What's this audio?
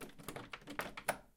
Door Locked 02
Office door. Recorded with Zoom H4n.
Door, field-recording, handle, locked